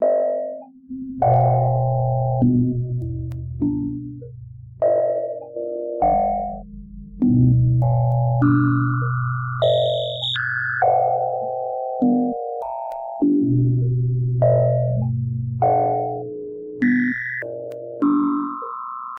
thriller at 100bpm